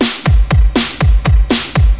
Just a drum loop :) (created with flstudio mobile)
synth, drums